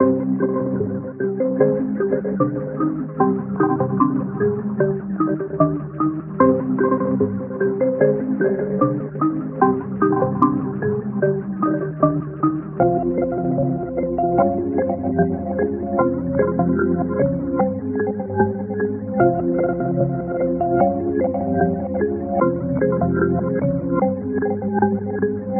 Trap Hip Hop Drill Sample Loops Melody